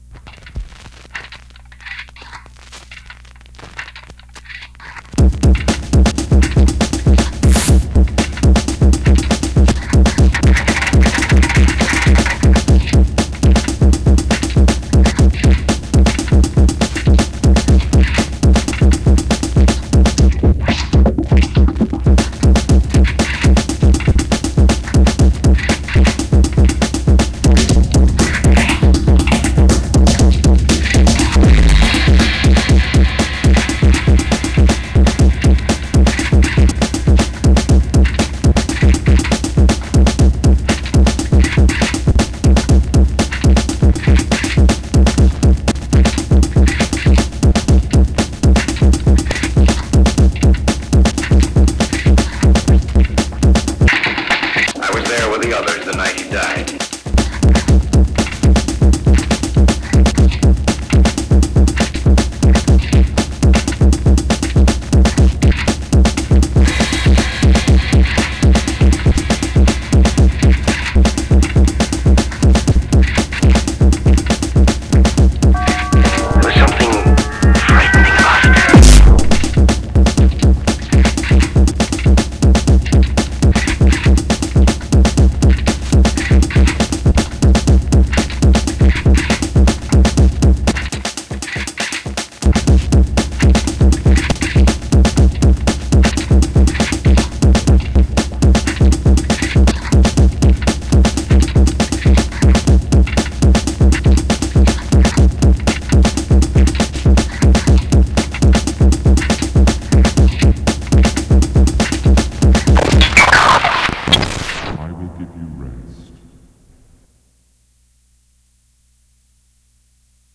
I used several different sounds from many different places to perfect this instrumental. I've worked on it for a month or so.
bass, beat, boom, ching, horror, rest, samples, song, there